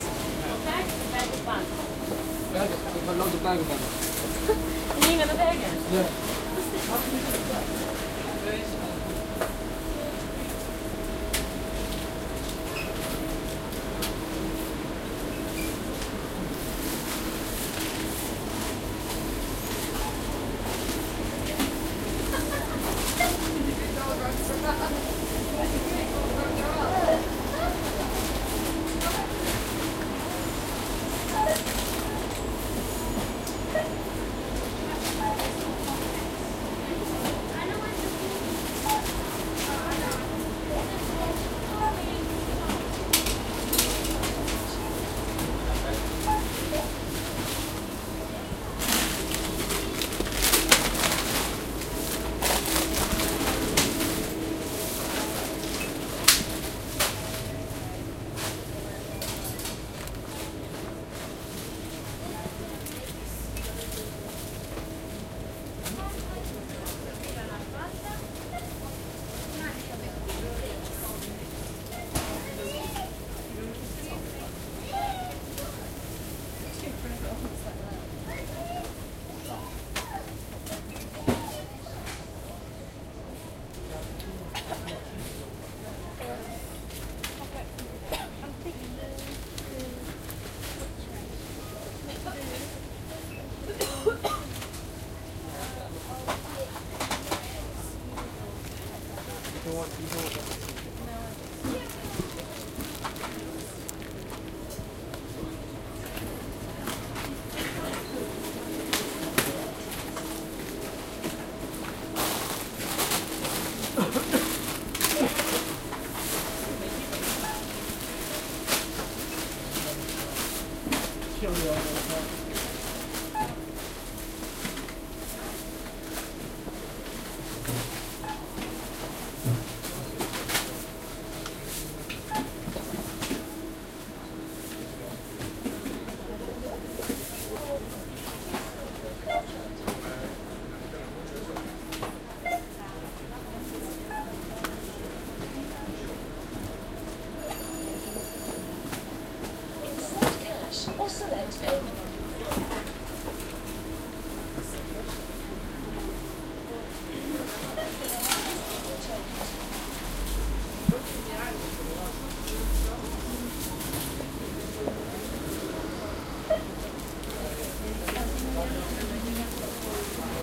in the queue ...